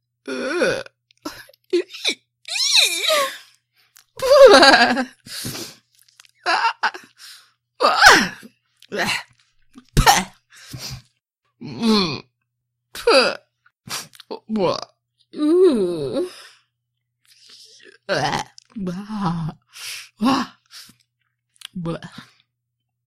AS086508 disdain
voice of user AS086508
aversion, contempt, despisement, despite, despitefulness, disdain, disgust, female, human, loathing, repugnance, repulsion, revulsion, scorn, vocal, voice, woman, wordless